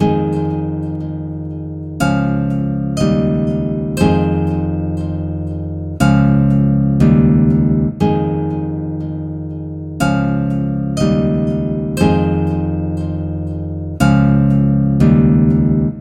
a gentle loopable acoustic guitar riff. Guitar only or with 4 bit pad or lsd waves for your enjoyment! As always you can use the whole riff or any part of it to do with as you please.